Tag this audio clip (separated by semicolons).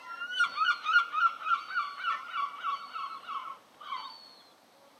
birds; birdsong